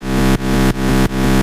Bass 1 170 BPM C
A bass in C played at 170 BPM created using modern digital synthersizers and processors aimed at Hard Dance/ Hardcore/ Hardstylz
Bass, Hardcore, Power-Stomp, UK-Hardcore